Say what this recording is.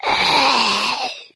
A growl, possibly for a zombie of some sort. Who knows! Original purpose was for video games, though. Recorded with a Logitech g40 headset, with no additional edits.